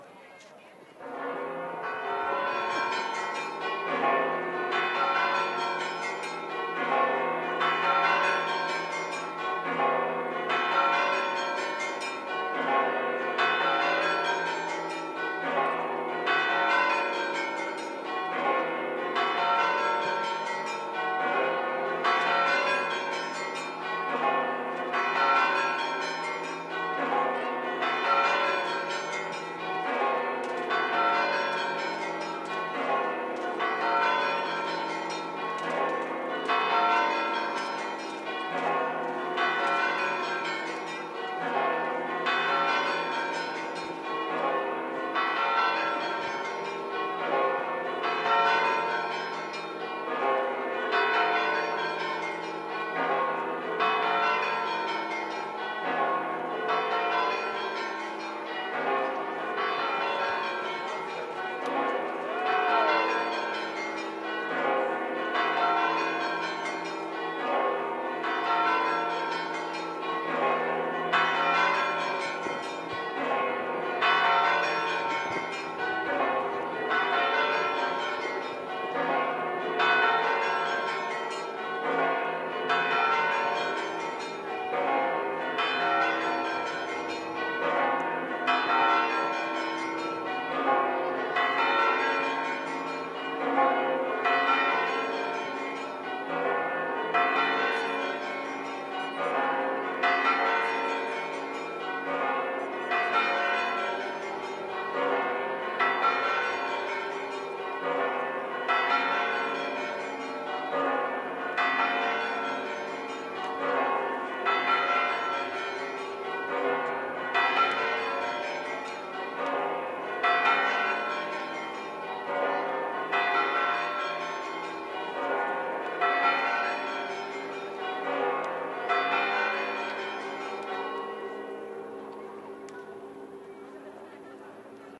FX - campanada gorda